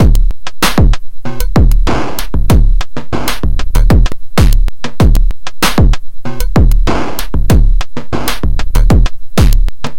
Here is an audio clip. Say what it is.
96bpm fx A+B Pattern
cheap, rhythm, beat, PO-12, drum, percussion-loop, pocket, engineering, Monday, distortion, drums, loop, 96bpm, mxr, machine, teenage, operator, drum-loop